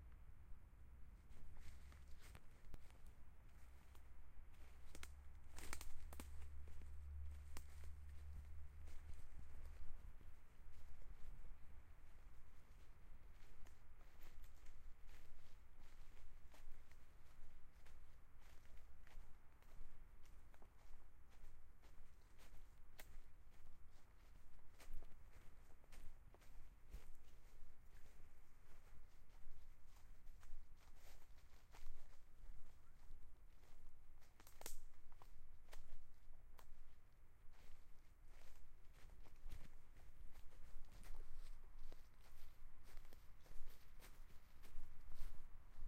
folhas caminho terr#1831117
Porto, ambient-sound, natural, park, ulp-cam, vegetation, wet-leaves